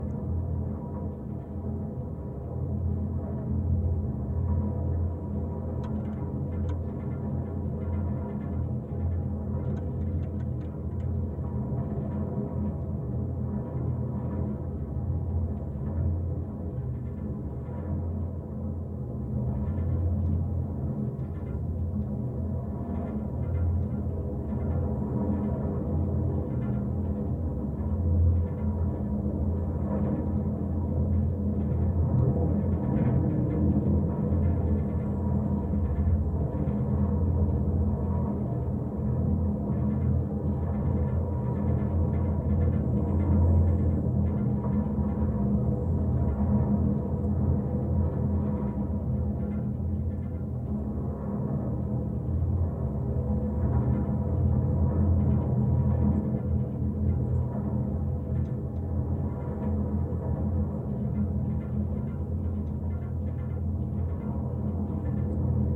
GGB 0319 suspender NE63SW
Contact mic recording of the Golden Gate Bridge in San Francisco, CA, USA at NE suspender cluster 63, SW cable. Recorded February 26, 2011 using a Sony PCM-D50 recorder with Schertler DYN-E-SET wired mic attached to the cable with putty. This seems to be the center of the bridge and the sound here is consistent across two different soundwalk sessions.